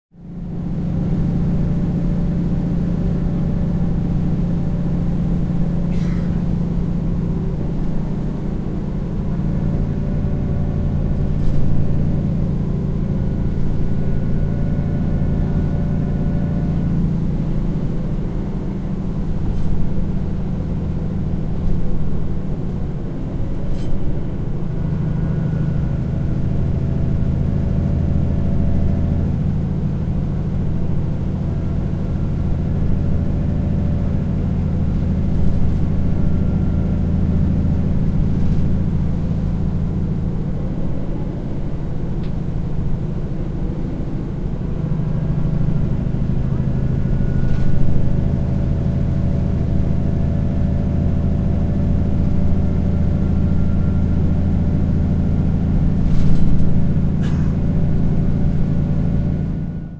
Autobus Interurbano Madrid Parte Atras Mucho ruido de motor